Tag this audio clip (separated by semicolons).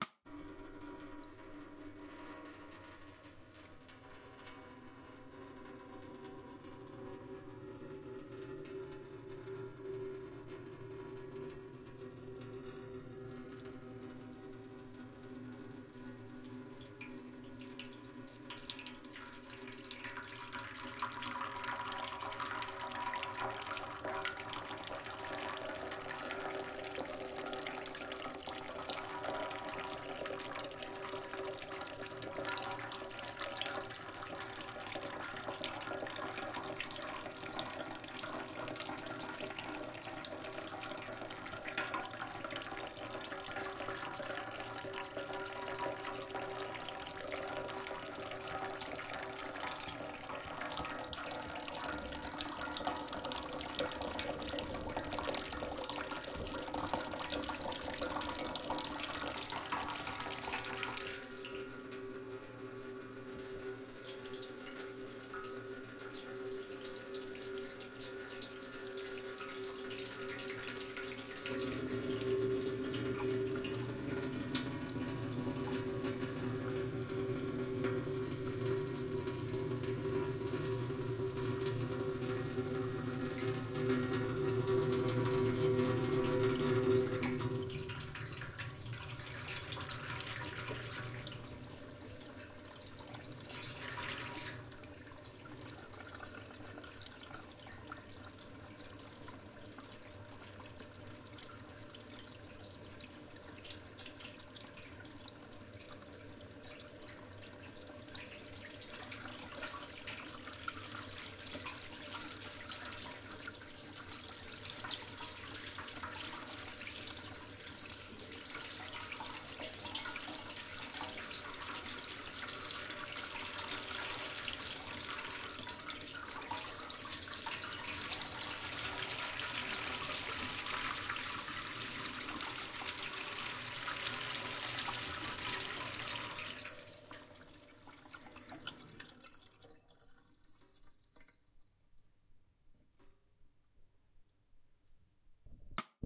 dripping; Drop; Jet; Kitchen; metal; Sink; slow; Steam; Water